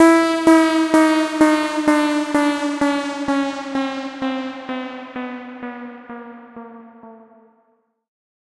Hitting E Sweep
rising, sweep, fx, sweeping, sound-effect, riser, sweeper, effect